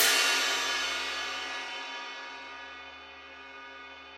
ChH18x20-1-EO~v03
A 1-shot sample taken of a special-effects HiHat cymbal combo stack (an 18-inch Zildjian A series Ping Ride as the top cymbal, and a 20-inch Wuhan Lion series China as the bottom cymbal), recorded with an MXL 603 close-mic and two Peavey electret condenser microphones in an XY pair. The files designated "FtSpl", "HO", "SO", and "O" are all 200,000 samples in length, and crossfade-looped with the loop range [150,000...199,999]. Just enable looping, set the sample player's sustain parameter to 0% and use the decay and/or release parameter to fade the cymbals out to taste. A MIDI continuous-control number can be designated to modulate Amplitude Envelope Decay and/or Release parameters, as well as selection of the MIDI key to be triggered, corresponding to the strike zone/openness level of the instrument in appropriate hardware or software devices.
Notes for samples in this pack:
Playing style:
Cymbal strike types:
Bl = Bell Strike
Bw = Bow Strike
E = Edge Strike
velocity, multisample, cymbal, hi-hat, 1-shot